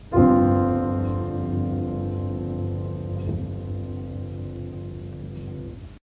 Piano Chord F
Some snippets played while ago on old grand piano
chord, piano